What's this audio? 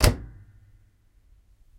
closing washing machine 06
The sound of closing the door from a washing machine.
ambient, bathroom, closing, closing-laundry-dryer, closing-tumble-dryer, closing-washing-machine, clothes, door, field-recording, furniture, home, laundry-dryer, stereo, tumble-dryer, washing-machine